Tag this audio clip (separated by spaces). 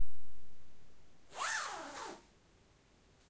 clothes coat jacket undress unzip zip zipper